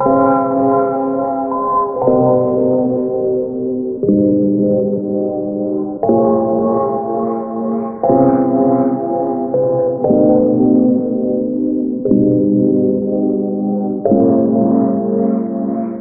Some Loops (Pitched 02)
melodic, emotional, lofi, nice, loops, funky, trap, minor, piano, keys